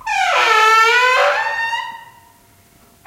Wooden Door Squeaking Opened Slowly 3
Series of squeaky doors. Some in a big room, some in a smaller room. Some are a bit hissy, sorry.
cacophonous, close, closing, discordant, door, gate, heavy, open, opening, portal, screech, shrill, slide, sliding, squeak, squeaky, squeek, squeeky, wood, wooden